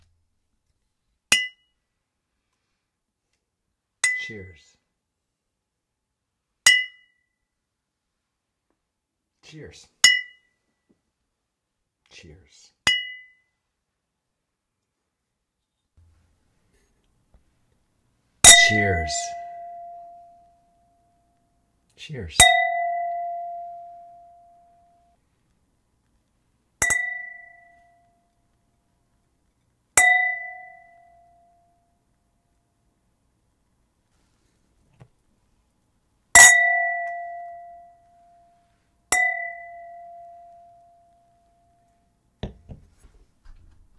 Beer bottles clanking together for cheers, followed by the more hollow sound of wind glasses clanking together for cheers. Sometimes SFX only, sometimes accompanied audible "cheers" (male voice)